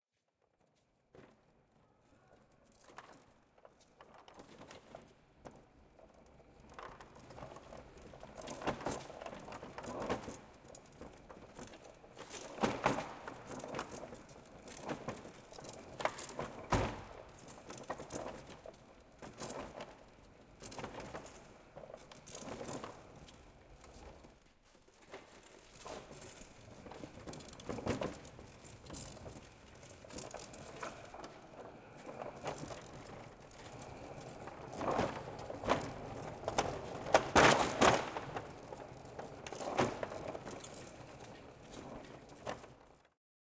trolley beeing pushed and shoved at different speeds to get some more different sounds to be used for storms or torn down areas.
loose-parts, OWI, shaking-skrews, trolley